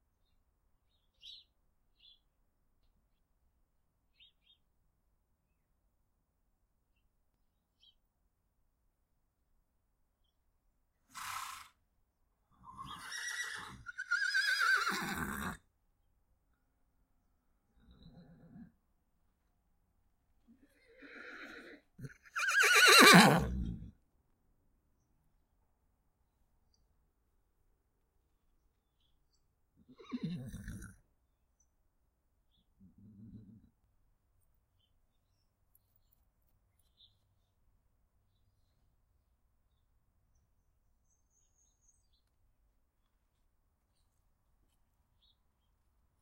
Stable Noises- Birds and Horses Neighing
Barn recording of several horses vocalizing, with birds in the background. Recorded with a TASCOM DR-5.
barn, birds, Horse, horses, neigh, nicker, snort, stable, whinny